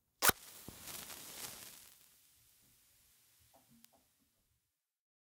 match strike 01
Striking or lighting a match!
Lighting a match very close to a microphone in a quiet place for good sound isolation and detail. One in a series, each match sounds a bit different and each is held to the mic until they burn out.
Recorded with a Sennheiser MKH8060 mic into a modified Marantz PMD661.